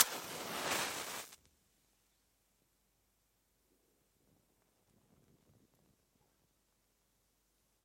Match Burn 2
Recording of a match being burnt using an Octava MK12 through a DBX 586 Tube preamp